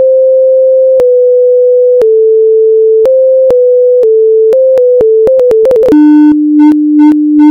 GLISIC Marijana 2020 2021 suspense
For this sound, I put 3 higher and higher tones from 523.26 hz to 441.50 hz that I copied and pasted several times, shortening them each time, then I ended up with a 200 hz tone on which I increased the level and added the wahwah effect and an echo.